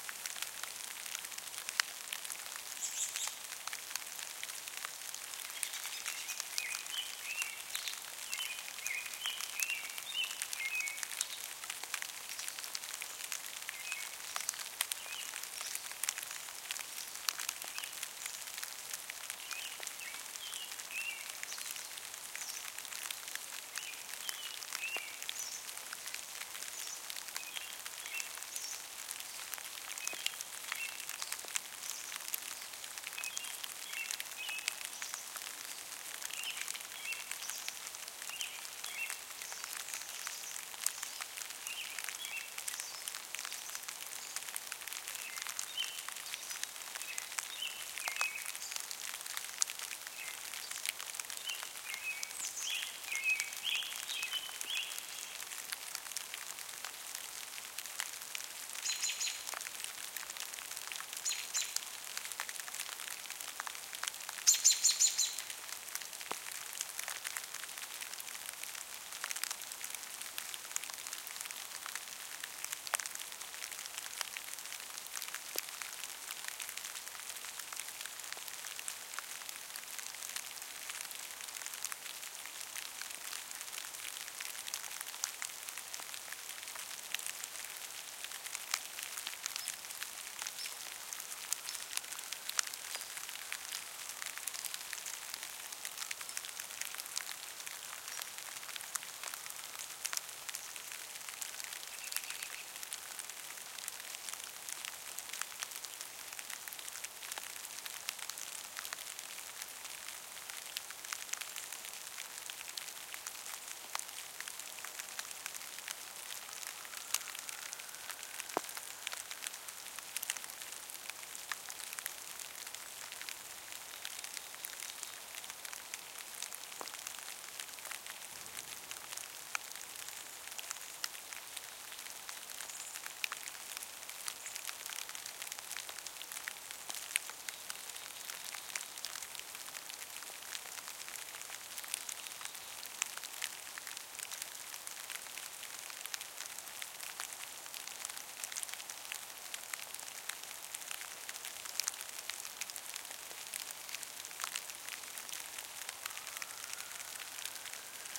forest rain on plants and birds2
plants, rain, birds, forest